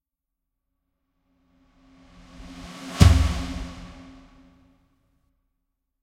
Drum Hit 1 FF038
1 solo beat on a drum. Build-up and then quick beat. Air movement. Medium pitch.
Drum-hit, Drum